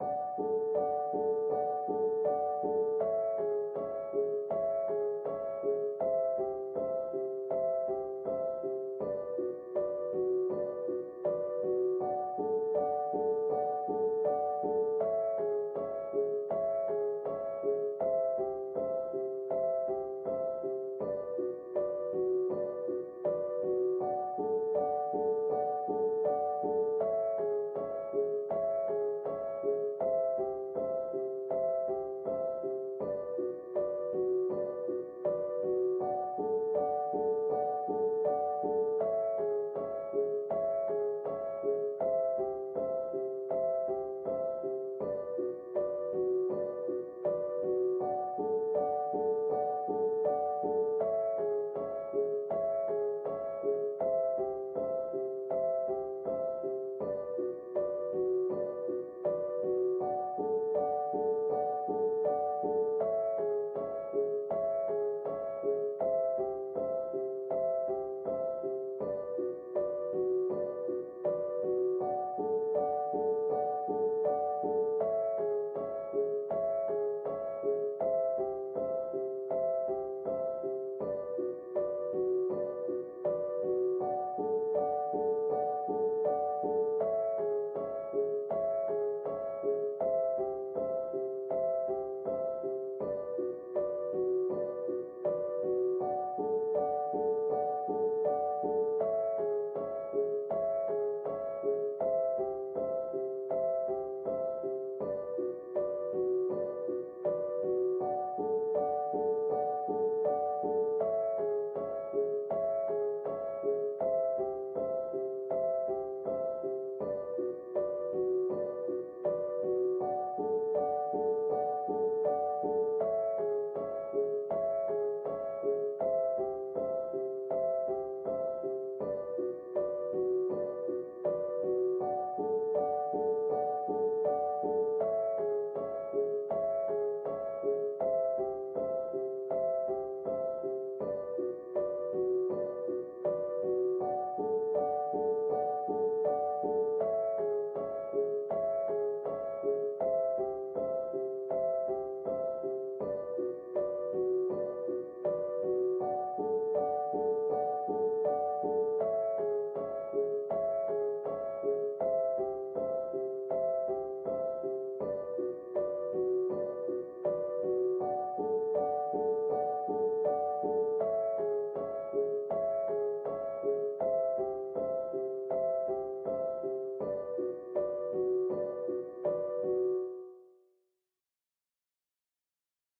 Dark loops 028 piano 80 bpm
80, 80bpm, bass, bpm, dark, loop, loops, piano